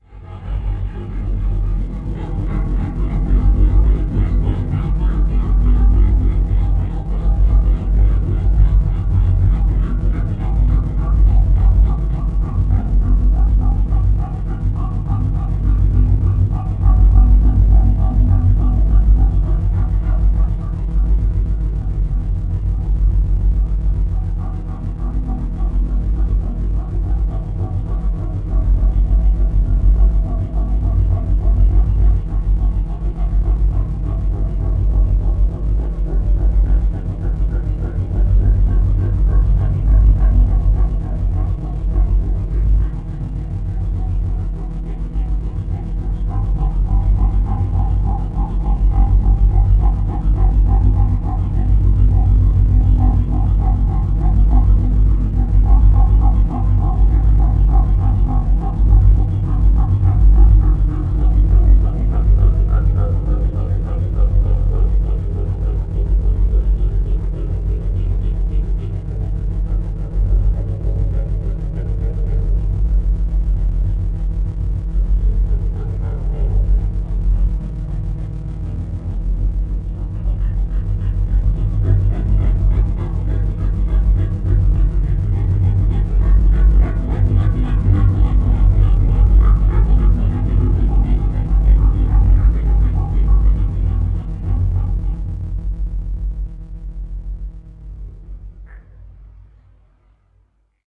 Granular drone. The AM pulsing is driven by the Lorenz chaotic attractor implemented in reaktor
Sampled didge note (recorded with akg c1000s) processed in a custom granular engine in reaktor 4
granular, low, reaktor, throb